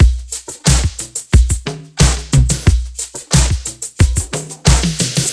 Created in Hydrogen and Korg Microsampler with samples from my personal and original library.Edit on Audacity.
library, korg, groove, pattern, free, beat, loop, kick, fills, pack, edm, bpm, drums, hydrogen, sample, dance